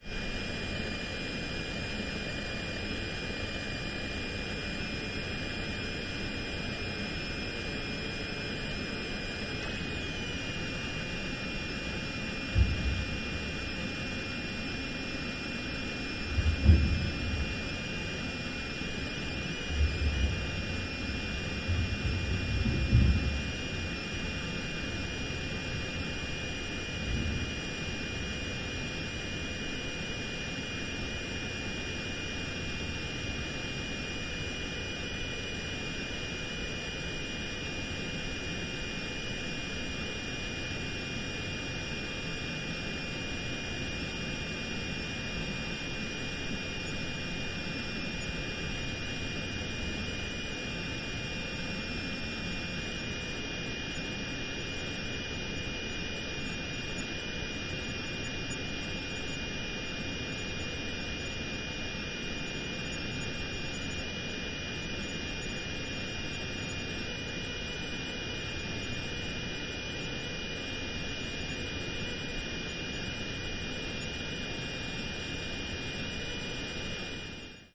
110813-radio oure
13.08.2011: fourteenth day of ethnographic research about truck drivers culture. Oure in Denmark. In front of fruit-processing plant.Inside the truck cab. Swoosh and disruption of the car radio being out of tune.
car-radio, denmark, disruption, field-recording, oure, out-of-tune, radio, swoosh, truck